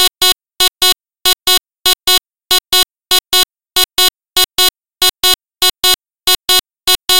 The curve has been draved in Audacity and edited